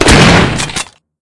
Doom Shotgun 2017
(LOUD) My own interpretation of what the Doom Shotgun in 2017 would sound like. Created, by me, with minuscule snippets of audio from the original SFX alongside my own custom-made audio and Audacity.
Doom, Shotgun, Retro